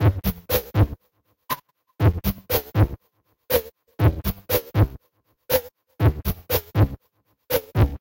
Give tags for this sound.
120-bpm
loop
electronic
processed
computer
beat
rhythm

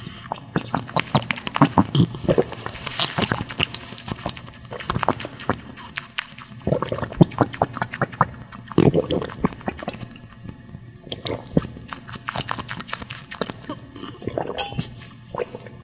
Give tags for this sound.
Drinking
water
pour
GARCIA
liquid
MUS
drink
SAC

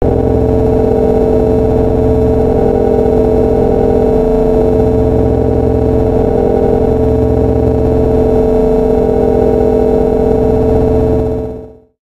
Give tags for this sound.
synthetic
genetic-programming
scala-collider

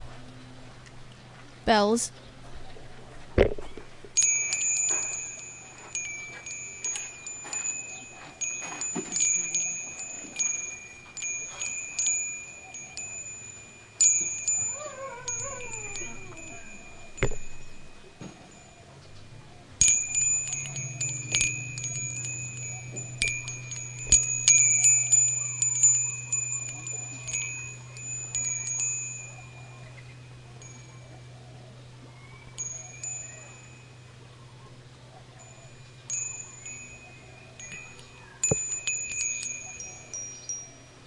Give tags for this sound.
bell
bells
chime
temple

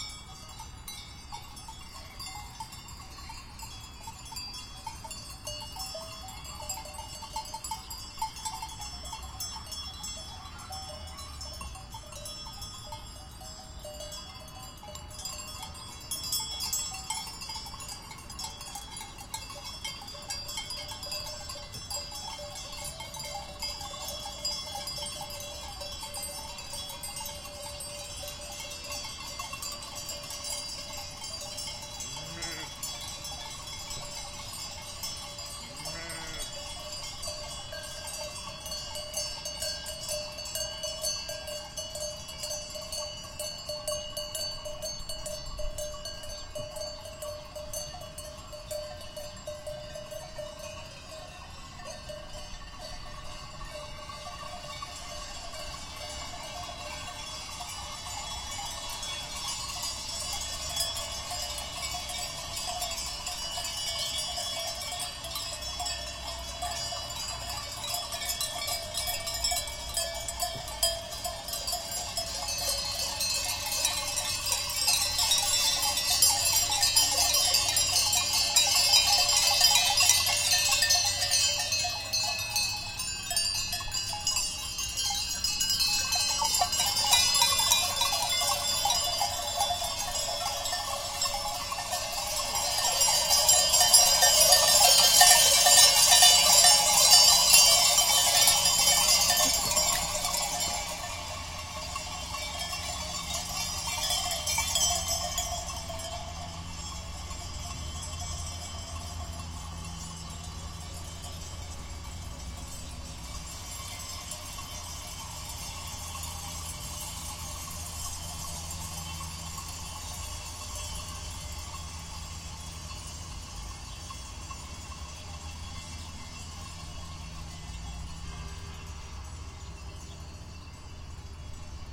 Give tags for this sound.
field-recording
Lamb
Sheep

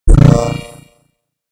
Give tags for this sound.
electro
computer
fx
sfx
synth
game

